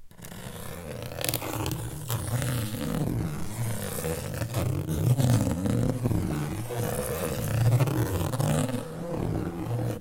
Rotate metal 1
Recorded with H4n - Rotating two bits of aluminium in a circular motion.
circular, stereo, ring, rotate, soft, metal